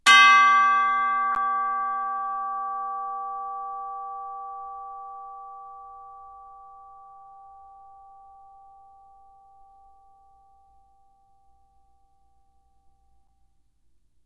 chimes g3 fff 1
Instrument: Orchestral Chimes/Tubular Bells, Chromatic- C3-F4
Note: G, Octave 1
Volume: Fortississimo (FFF)
RR Var: 1
Mic Setup: 6 SM-57's: 4 in Decca Tree (side-stereo pair-side), 2 close
bells, chimes, decca-tree, music, orchestra